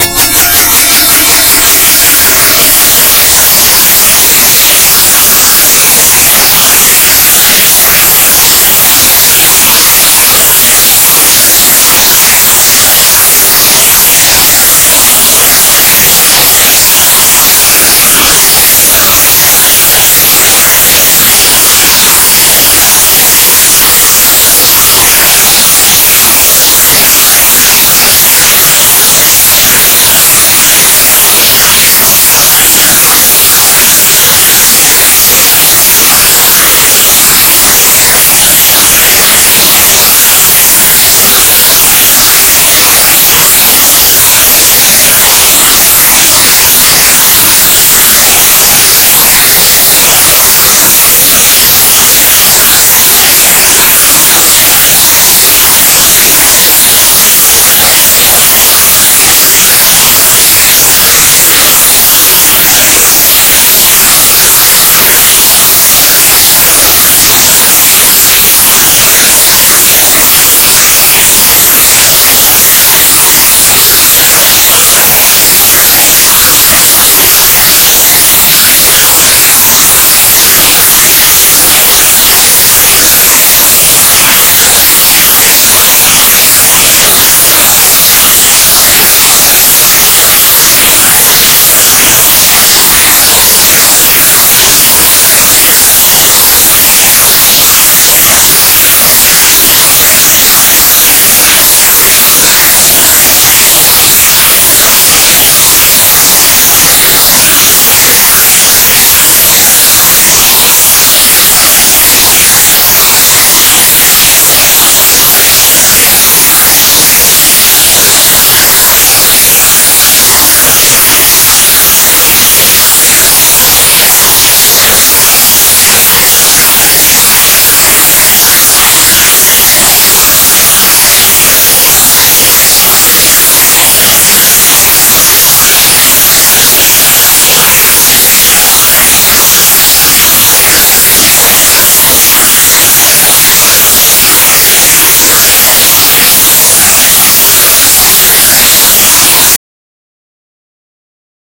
JK Bullroarer
processed and manipulated, sounds like strings.
strings, sci-fi, manipulation, processed